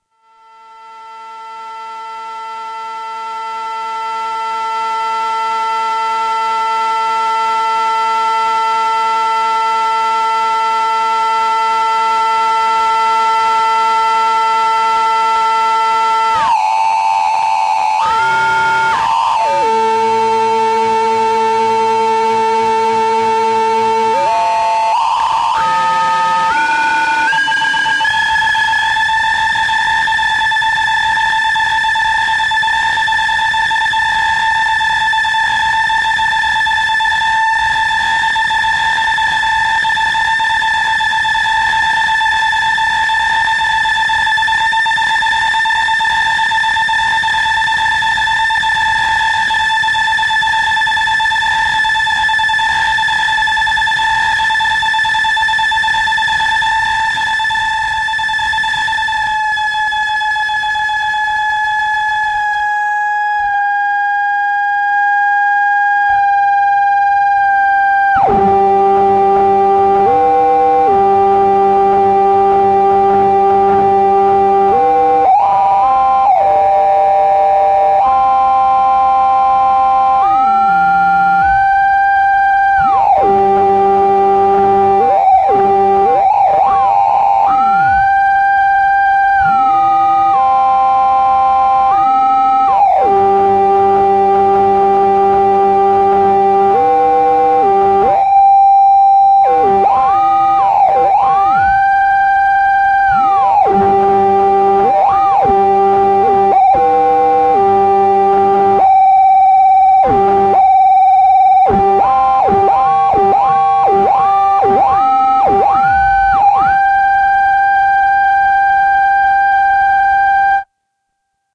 Feedback loop made by running aux send out to input and adjusting EQ, pan, trim and gain knobs. Added in another stereo input from zoom bass processor.